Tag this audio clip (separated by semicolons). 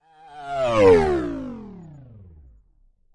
aliens; beat; car; explosion; fart; nascar; race; snore; space